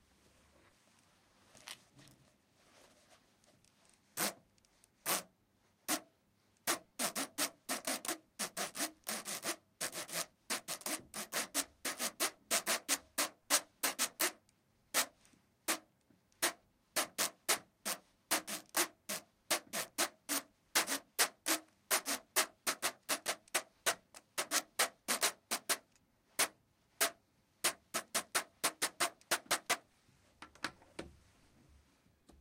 Cuaderno Espiral
book, cuaderno, cumbia, Espiral